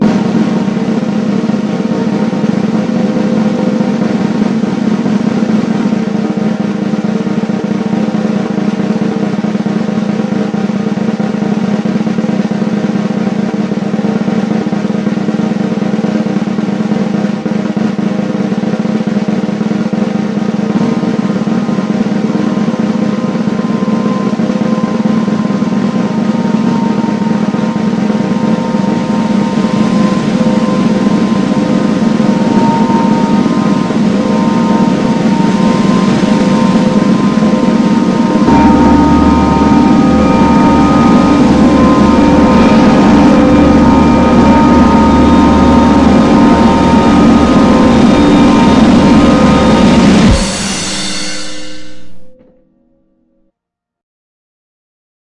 Drum Roll and Cymbal Crash - ear-rape
I created this sound in Audacity with a lot of Filter Curves applied! Re-uploaded because I had to reduce the high frequencies.
Drum Roll sound effect, with a cymbal crash at the end.
WARNING! Ear-rape! Loud sound! Decrease your system volume!
I intentionally boosted these frequencies by a lot:
75 Hz
291 Hz
919 Hz
1245 Hz
2376 Hz
3675 Hz (sounds like Sound 594161 but with amplitude variations)
Sound Sources:
Sound 19433
Sound 52760
Sound 564206
Sound 593618
Sound 592196
You can still use this sound!
sonic, SoundEffect, Sonic-Drum-Roll, roll, sound, crash, drum, drum-roll, ceremony, cymbal-accent, ear-rape, cymbal-crash, percussion, snare, cymbal